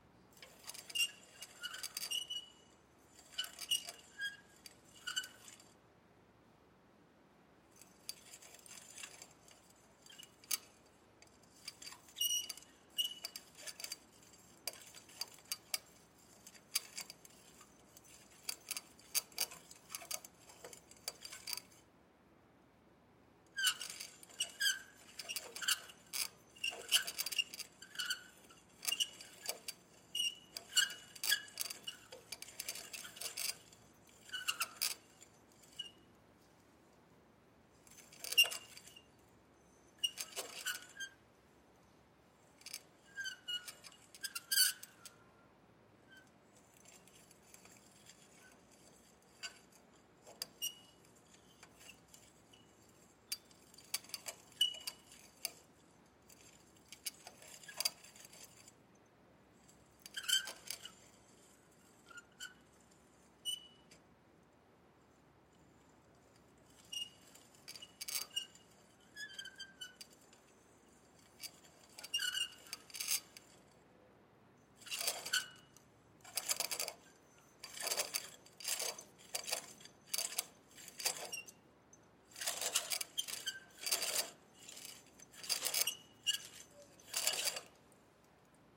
Clothesline metallic squeak
Apartment clothesline, resonant metal squeaks, reeling at various speeds. Some nice high freq content suitable for pitching down.
Recorded with a Schoeps MiniCMIT on a Sound Devices 633.